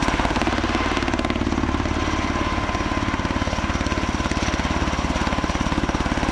Military air vehicle flying. Loopable